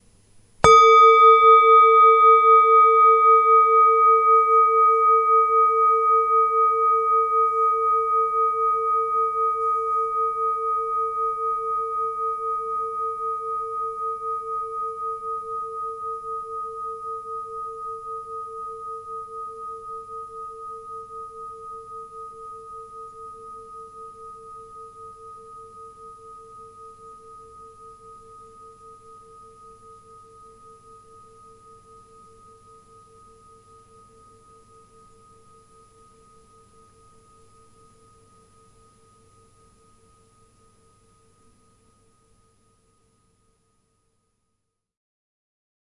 Singing Bowl 2 (medium)/ Klangschale 2
Just a simple, clear singing bowl :)
bell, klang, Singing-bowl, Buddhism, percussion, metallic, tibetan, sound, metal, Klangschale